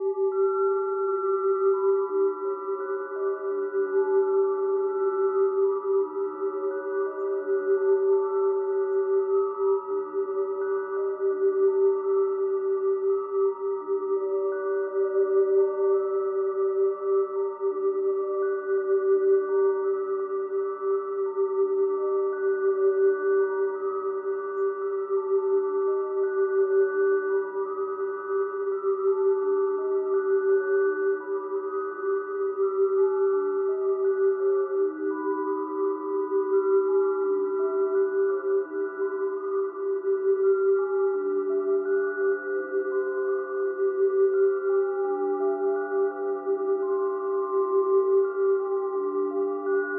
Creepy Soundscape
bogey, creepy, design, effect, fx, ghost, haunted, horror, nightmare, scary, sfx, sound, sound-design, spooky, terrifying, terror, weird